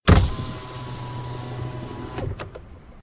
car window roll down
A Simple car window rolling down. A shortened version of Car Power Window from theshaggyfreak.
window, car, automobile, vehicle